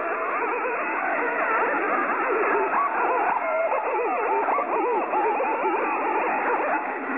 Weird shortwave noise.